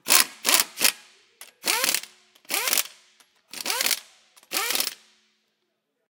The sound of a technician using an impact drill to put the wheel back on a car. There is some echo due to this being recorded in a huge auto shop.
Recorded with an AT4021 mic into a modified Marantz PMD661.
auto, automotive, car, foley, impact-drill, lug-nuts, mechanical, tire, tool, wheel, workshop